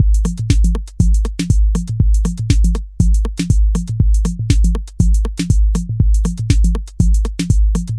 dance beat 120
808, 909, beat, dance